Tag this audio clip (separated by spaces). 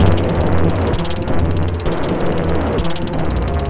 alien bio-mechanic dark factory industrial loop machine machinery mechanical noise organic robot robotic scary